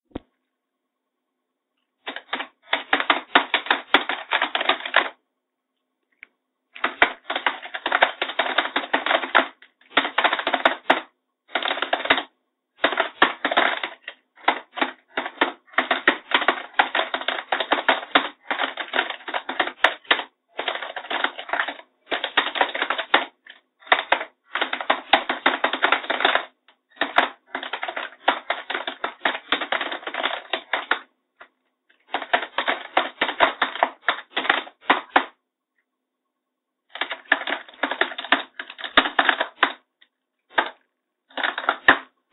keyboard sound
this sound is typing on the keyboard